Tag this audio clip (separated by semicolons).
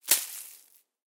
grass; leaves; impact; foliage; crunch; bush; tumbleweed; hit